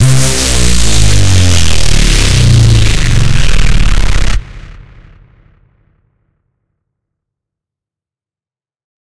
Reece Drop
Used by Serum (The epic Reece sound)
Loop, Closed, Kick, Electronic, TR-606, Open, Synth, Synthesizer, Beat, Bass, Drums, 1, 4x4-Records, Drum, Electric-Dance-Music, Off-Shot-Records, Vermona, Stab, Sample, Snare, Analog, House, DRM, EDM